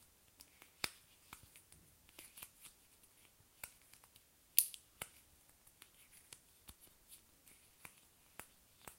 Playing With Pre-stick
this is the sound pre-stick makes when you play with it.